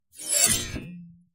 BS Scrape 11
metallic effects using a bench vise fixed sawblade and some tools to hit, bend, manipulate.
Effect, Screech, Scuff, Metal, Grate, Rub, Grind, Scrape, Sound, Scratch